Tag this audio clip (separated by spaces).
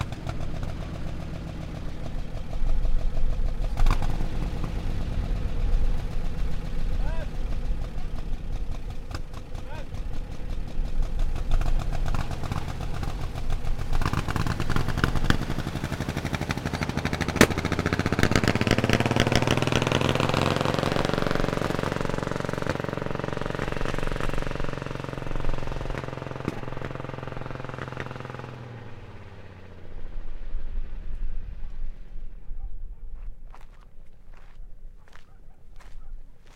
old engine leaving car motorcycle